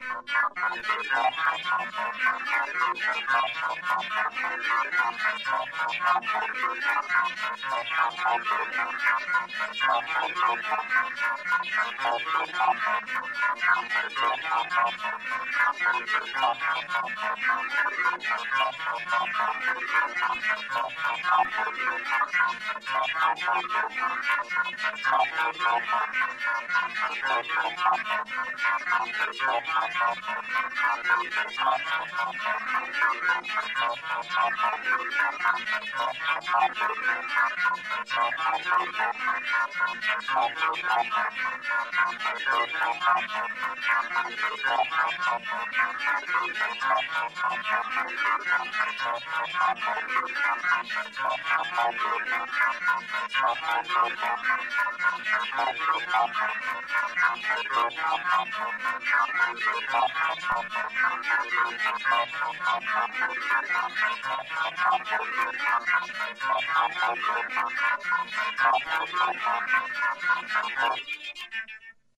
1 of 5 hypnotic drones. About 1 minute long each,quite loopable drones / riffs for all discerning dream sequences, acid trips and nuclear aftermaths.
life, synth, instrumental, drugs, downtempo, nothing, experimental, universe, ambient, drone, loops, chill, electronic, everything, acid